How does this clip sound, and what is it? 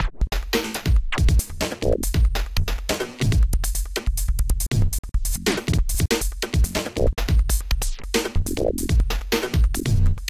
Experimental drums 03
140bpm, computer, electronic, FL-STUDIO, glitch, Illformed, loop, vst